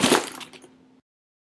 A sound I made on vacation. It's made of 2 bags that I shook around, and was meant to sound like the classic COD weaponSwap sound. ENJOY!
My name is twisterOrtiz:)
THANKS